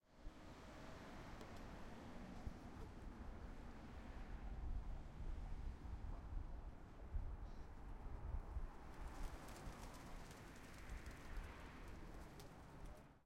rear ST NYC pigeons up in city amb
surround, traffic